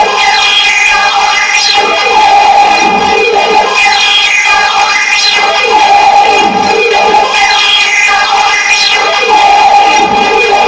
der Abgrund #2
synth, noise, industrial